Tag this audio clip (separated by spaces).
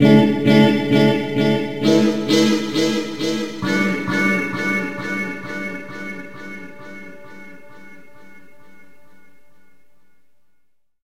drone guitar vibrato delay phase ambient pitch echo shoegaze